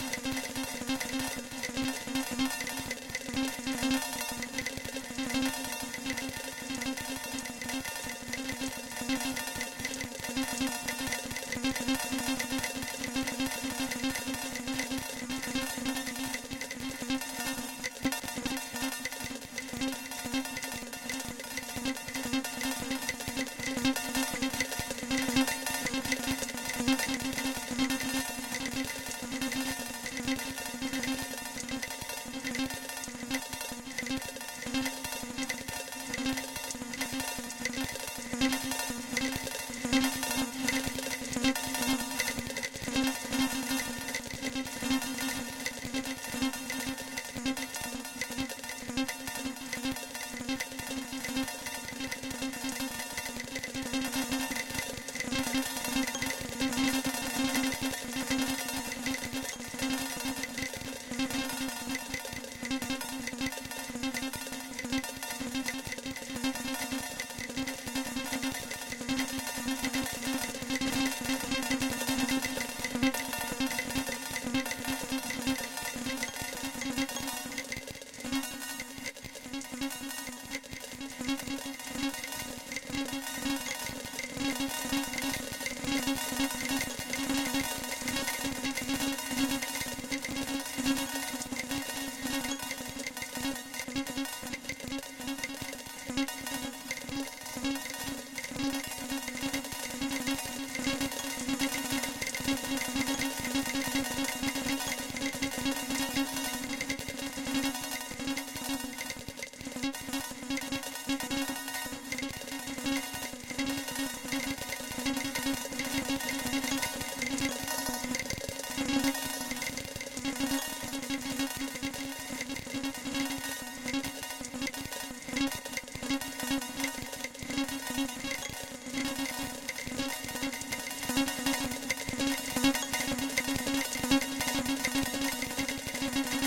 piovono-pianoforti
hyperprocessed piano like sound
chuck, dreamlike, piano-like, puredata, supercollider